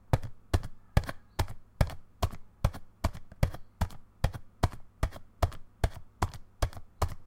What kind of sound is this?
I was making a game and couldn't find any decent runner steps, so I recorded this using a Tissue pack and my fingers XD.
Hope some one else will enjoy this too.
steps, game, footsteps, runner, running, run